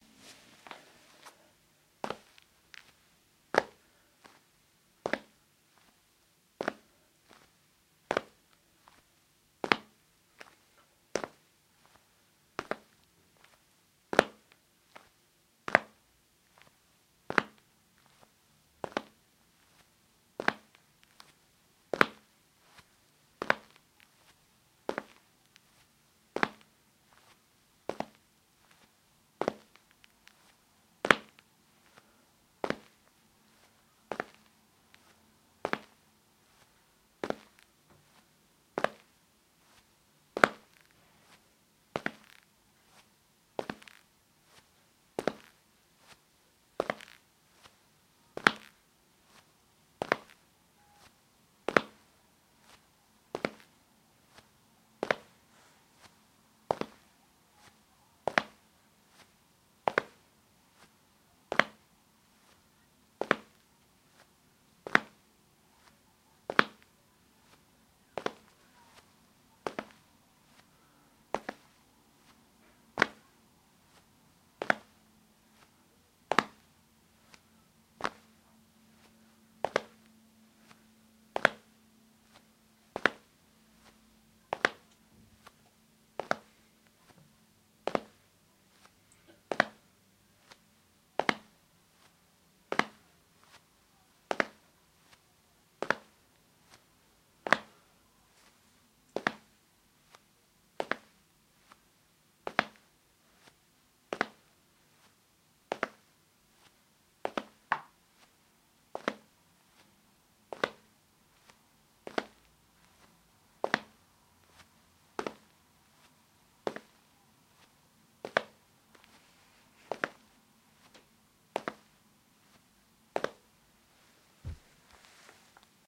Footsteps, Sneakers, Tile, Slow
Hard-soled sneakers on a tile floor with a slow pace. Recorded using a Shure SM58 microphone.